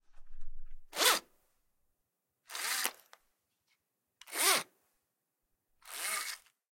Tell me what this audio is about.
Elastic Key Ring
Key ring with retractable elastic pull cord
Zipper, Key-ring, Elastic